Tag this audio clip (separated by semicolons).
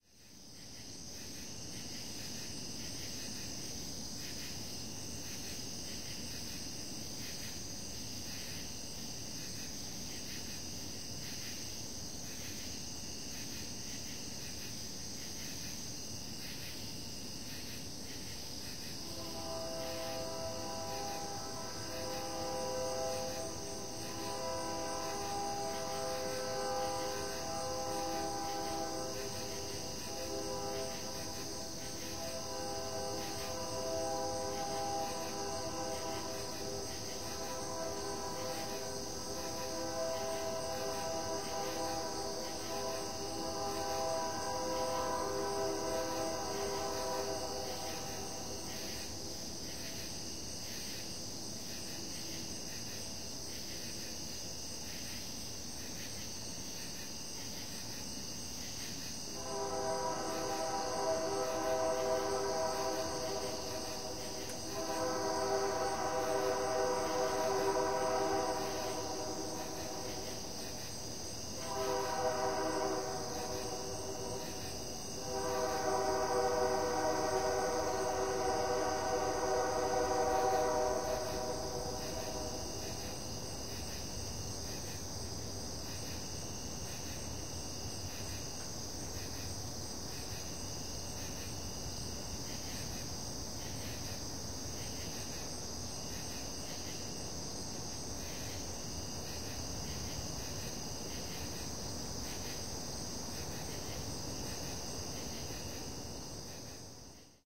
sound-scape; train; morning; August; field-recording; insects; summer